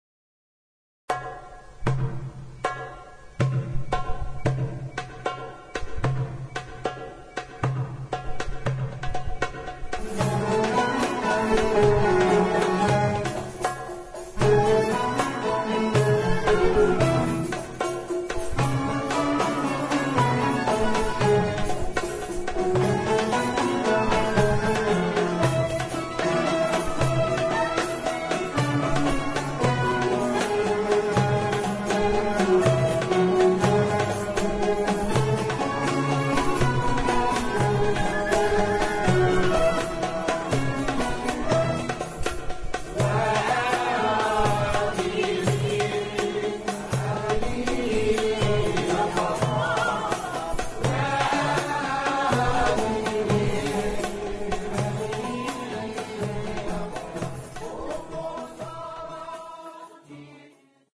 Btayhi Msarref Rhythm+San'a
Btayhí msarref (light) rhythm with ornaments, applied to the San'a "Yismí Nahíl" of the mizan Btayhí of the nawba al-Hiyaz al-Kabir
arab-andalusian, btayhi, compmusic, moroccan, derbouka, andalusian, msarref, orchestra